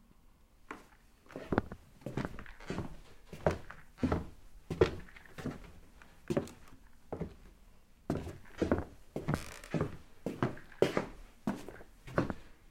Walking On A Wooden Floor
feet, floor, foot, footstep, footsteps, ground, hardwood, shoes, step, stepping, steps, walk, walking, wood, wooden-floor